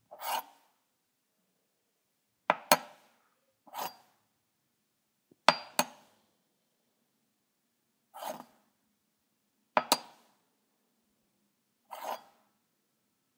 Spoon, pick up, put down on countertop
picking up and putting down a metal spoon on a countertop
countertop, down, spoon, kitchen, up, cooking, silverware